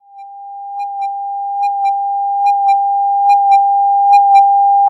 I created a "sinusoïde" sound on Audicity with a frequency of 800 and an amplitude of 0,8. I wanted to keep the "underwater" theme so I tried to make a radar sound that warns the user of something approaching.
To make this sound, I phased it and changed all the settings to make this "biping" noise. Then I normalised it and I put a fade in effect to increase the idea of something coming towards us.
Made with Audacity.
Description de Schaeffer:
V": itération varié
Masse : groupe nodal (plusieurs bips et le bip en fond)
Timbre Harmonique : nerveux, sec, artificiel, synthétique
Grain : Son lisse
Allure : C'est un son continu qui se divise en deux parties : le bip en fond qui est continu, et les petits bips qui sont toniques.
Dynamique : La dynamique affolante, on a un sentiment de proximité grâce au fondu en ouverture. Ce n'est pas agressif mais stimulant.
Profil Mélodique : Variation scalaire avec les différents bips toniques